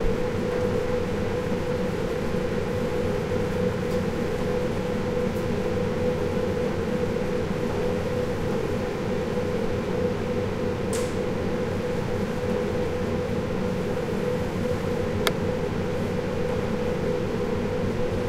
air ventilation system outside in the rain
Zoom H2 recording of some kind of air supply system in a courtyard in Berlin.
breath, breathing, device, electrical, heating, loop, motor, pump, rotor